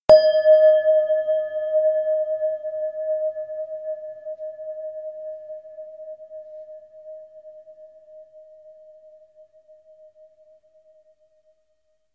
Synthetic Bell Sound. Note name and frequency in Hz are approx.
digital, bell, synth